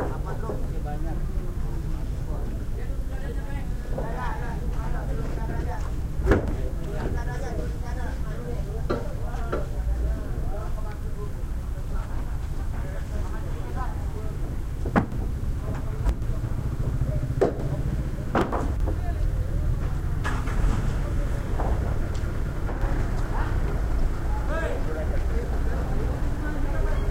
20070616 040101 jakarta sunda kepala haven
Kepla harbour in Jakarta, Indonesian people loading 50 kg cement bags onto a boat. Java, Indonesia.
- Recorded with iPod with iTalk internal mic.
field-recording, harbour